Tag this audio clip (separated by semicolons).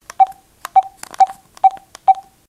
beep; button; digital; electronic; home; house; noise; phone